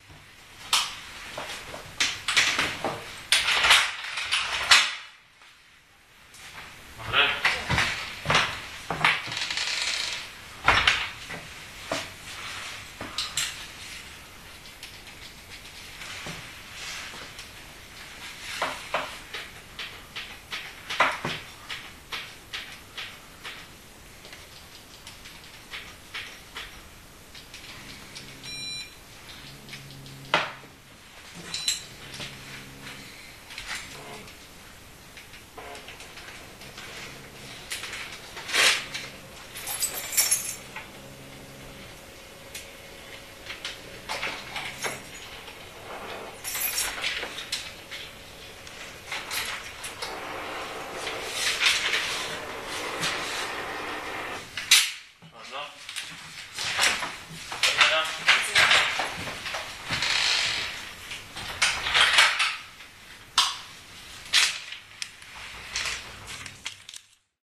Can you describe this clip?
power industry worker 031110
03.11.2010: about 9.30. my flat in the tenement on Gorna Wilda street in Poznan. the sound event: the power industry worker is reading the meter. sound of opening the doors, saying good morning and good bye, printing the electricity bill.
voice, opening-the-door, poznan, people, field-recording, reading, printing, flat, poland, clicks, power-industry-worker